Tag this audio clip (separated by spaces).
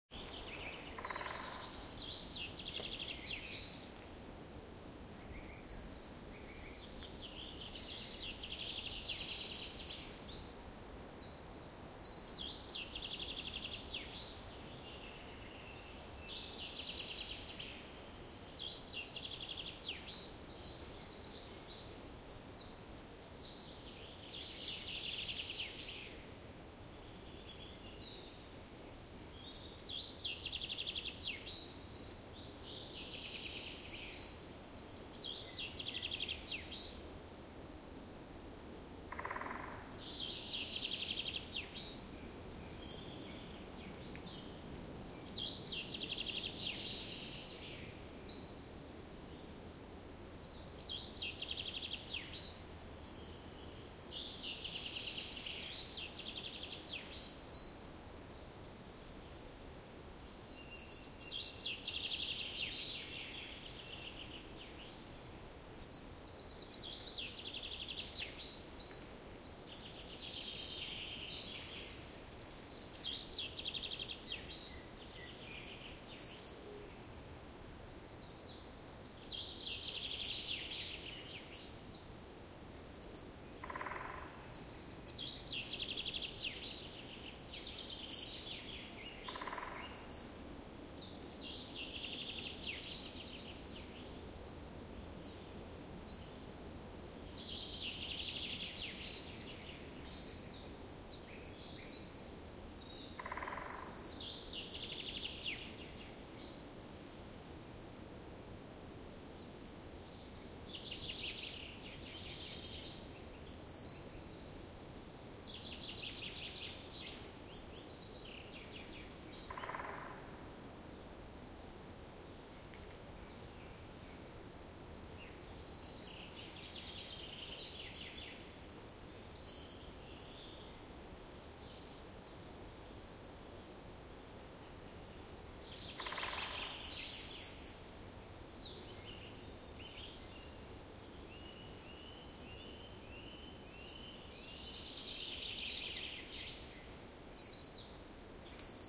birds forest morning song wind woodpecker